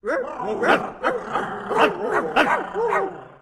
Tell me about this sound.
Dog sounds made by human2
These are dog sounds made by a small group of people and is very cartoonish.
cartoon, human, dog, bar, growl